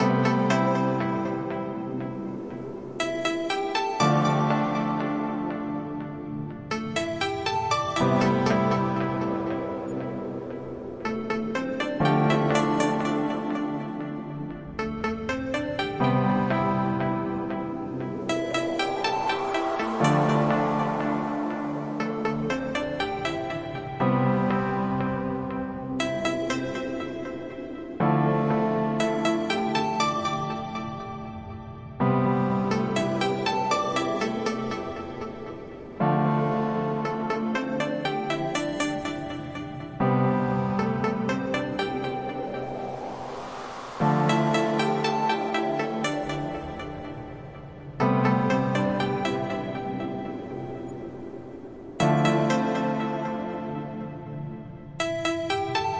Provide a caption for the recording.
ambient electro loop
noise, ambiance, atmosphere, music, ambient, ableton, effect, electronic, loop, original, electro, synth, loopmusic